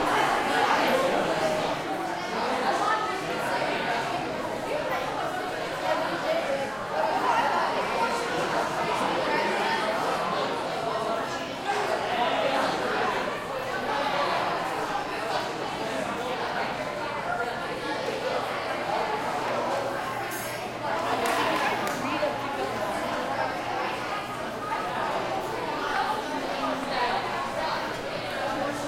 crowd int high school cafeteria busy short
cafeteria, crowd, school, busy, high, int